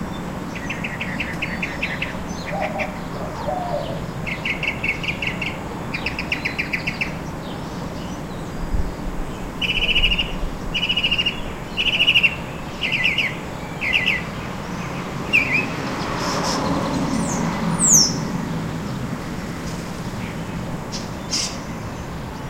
backyard afternoon
Recorded outside in the backyard, with White-winged Dove, Northern Mockingbird and European Starling. There is also a lot of background sound from traffic and a car passing close by. Recorded with a Zoom H2.
songbird, bird, summer